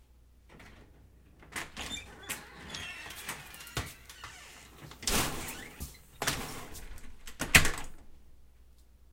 A great recording of someone entering a house. You can clearly hear the storm door as well as the main door.
close, door, open, recording, slam